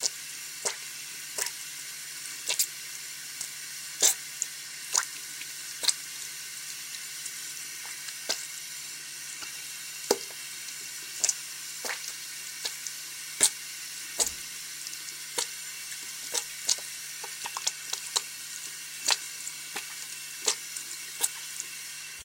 Blood Splishes 1
Just a sink and some hands. Tell me what you think! Recorded with ATR-55
decapitated, goop, gush